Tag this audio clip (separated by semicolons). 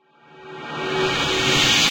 whoosh; swoosh